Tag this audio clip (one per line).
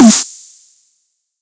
end
puncy
Skrillex
snare